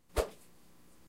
Plastic wand whipping quickly through the air.